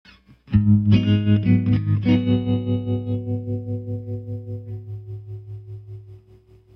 Tremolo and twang guitar #6
A little guitar chord move in Ab minor with tremolo
guitar, minor, tremolo, twang